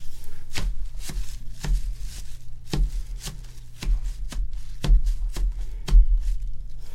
foley para animacion pasos llama
animation, effect, sfx